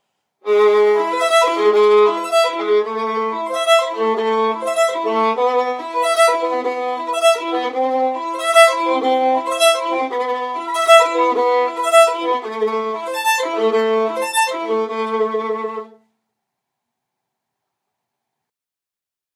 This is an acoustic violin loop recorded with GarageBand.